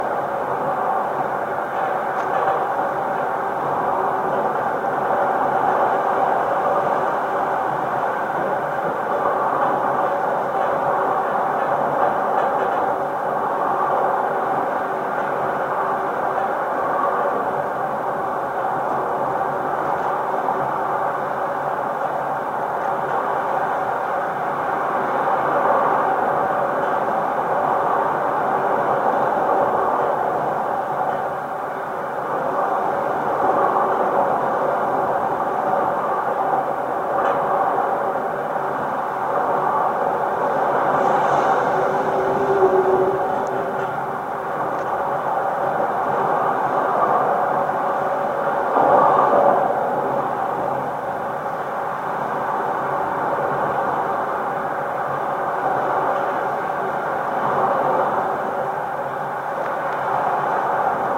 GGB 0409 Handrail Post SE79 N
Contact mic recording of an upright guard-rail post on the Golden Gate Bridge in San Francisco, CA, USA about three-quarters of the way to the Marin County side of the main span. Recorded August 20, 2020 using a Tascam DR-100 Mk3 recorder with Schertler DYN-E-SET wired mic attached to the cable with putty. Normalized after session.